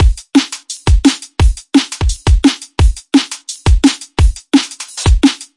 inline - drum-loop-172
172 bpm drum and bass drum loop
dnb, drum, drumandbass